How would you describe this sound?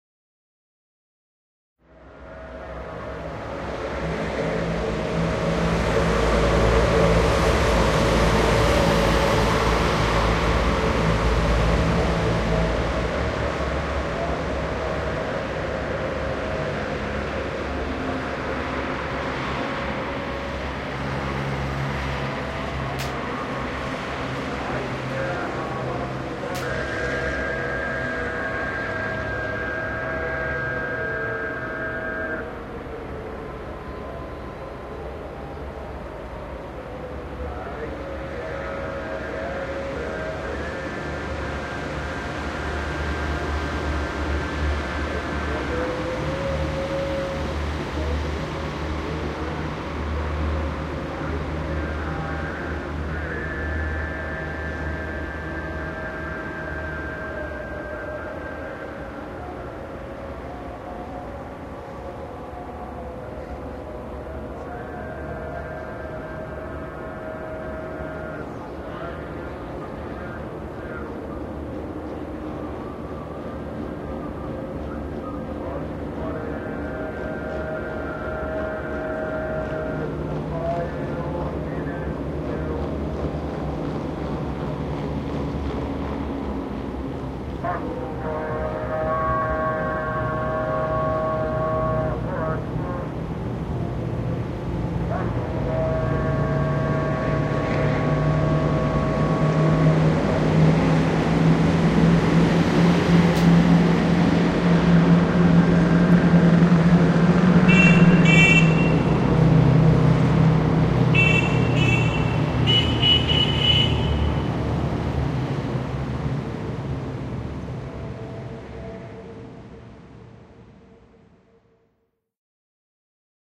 Cars Pass Muezzin
Behind the foreground of slowly passing cars, the call to prayer.
field, cars, ambience, loudspeaker, muezzin, egypt